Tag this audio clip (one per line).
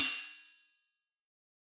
multisample
hi-hat
velocity
1-shot